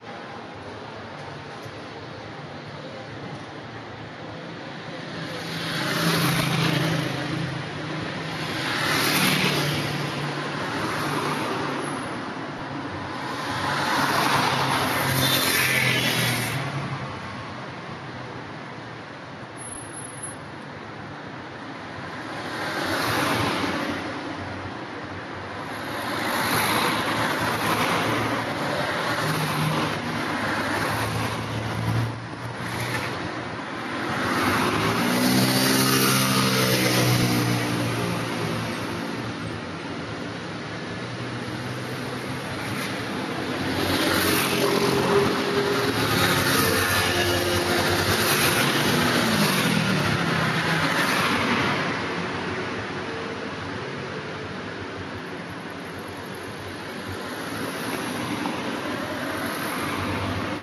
graffito uccello masaccio
florence, ambient-noise, background-noise, firenze, noise, city, background, ambient, car